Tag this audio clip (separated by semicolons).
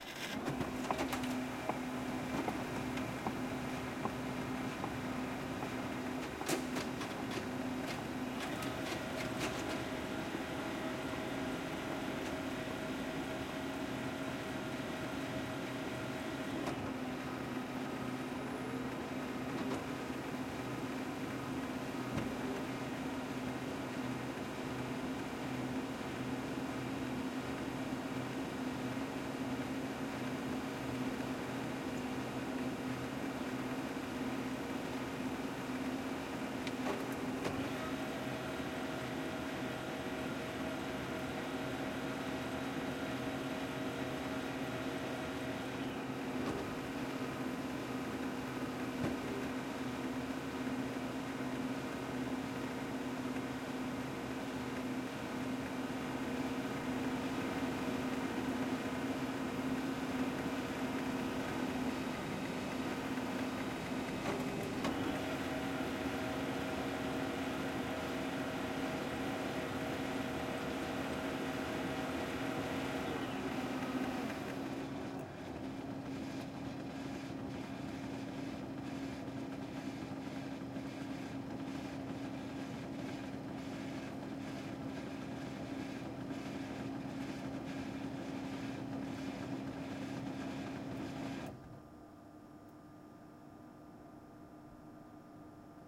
industrial; machine; machinery; mechanical; print; printer; Servo; spool; warm-up